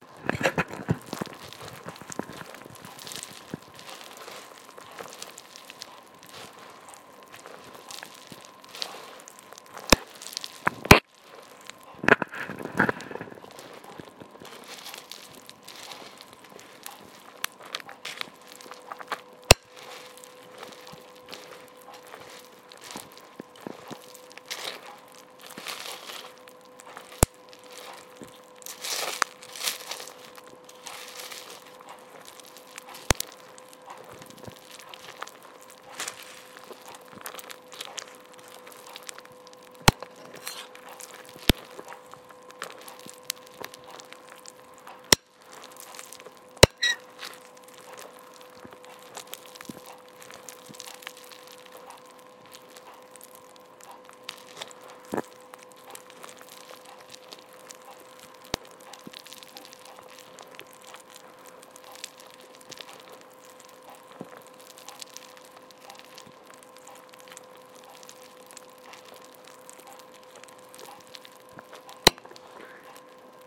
The sound of cereal in a bowl with milk being pushed around with a spoon.
It sounds a bit like squelching gore or worms writhing in gore. unfortunately it has the occasional pop.